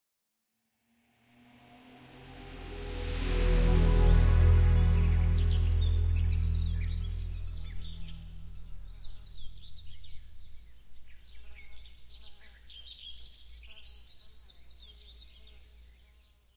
bil logo uden melodi
different car related sounds with som soft synth in the background
auto, car, drive, ignition, lindholm